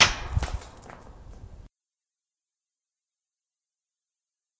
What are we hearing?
recording of dropping a stone on a metal bar